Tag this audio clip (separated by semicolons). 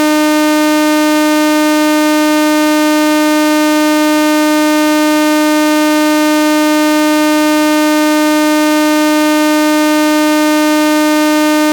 clean; oscillator